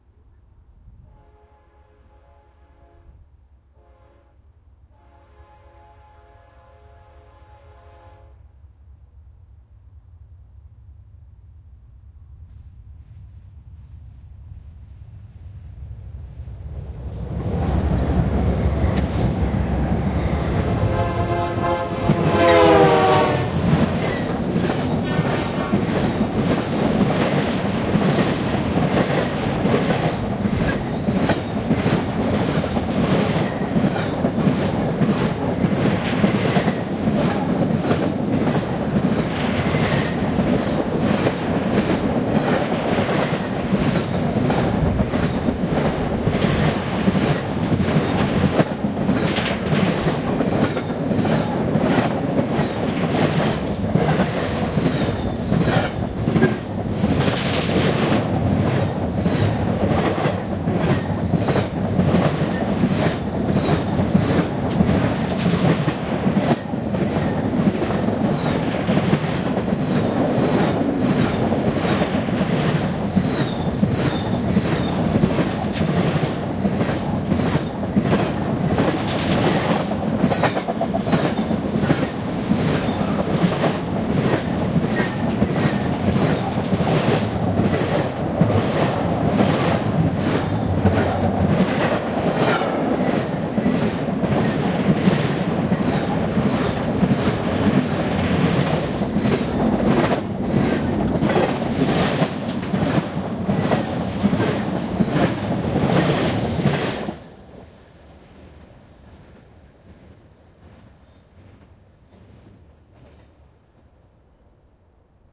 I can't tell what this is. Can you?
two trains-casablanca
Two freight trains passing one another in Casa Blanca, NM. A nice doppler effect is audible as the second train blares its horn while passing the microphone. Recorded using: Sony MZ-R700 MiniDisc Recorder, Sony ECM-MS907 Electret Condenser.
doppler, doppler-effect, doppler-shift, field-recording, freight, freight-train, horn, mechanical, new-mexico, railroad, train